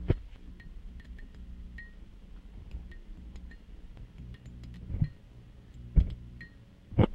I recorded this sound back in 2002. Hard to hear, but its a florescent light bulb that was about to burn out. It started making this sound.
clang, clink, ding, dink, plink